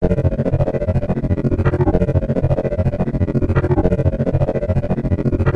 grain002atonal
Granular electronic industrial beat/sound.
ambient, atonal, dark, electronic, granular, industiral, loop